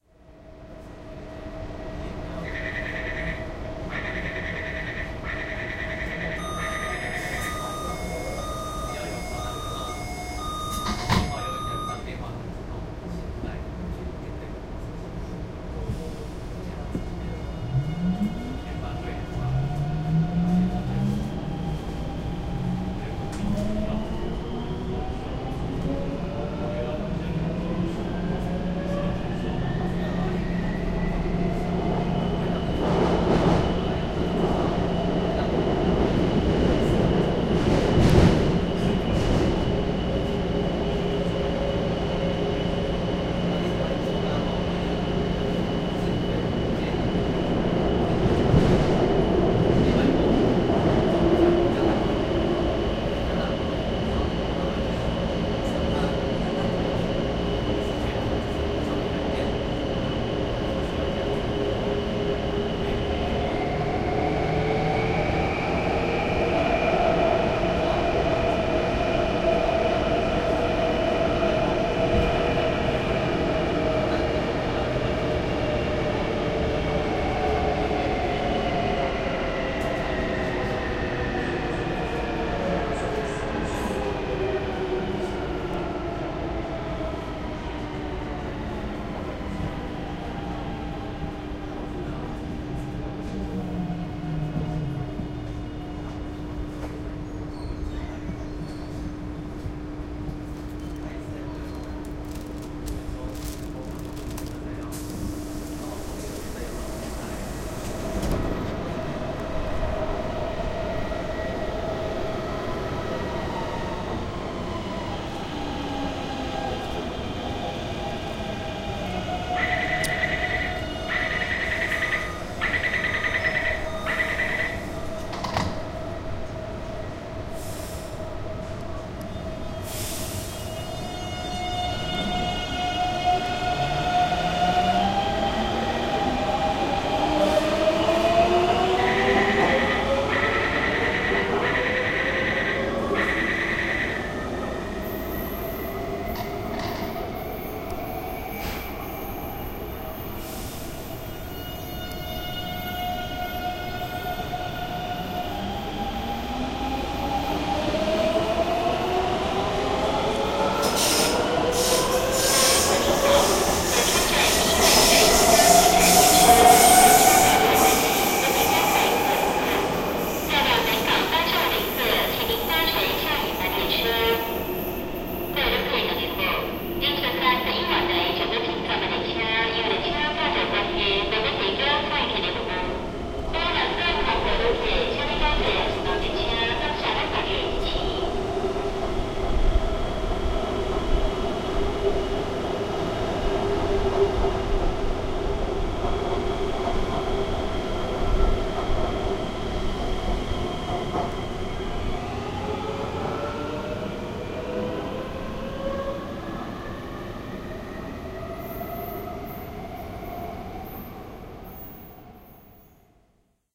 A ride on the MRT and then on the platform with a couple of trains arriving & departing. Raw.
2009, city, field-recording, train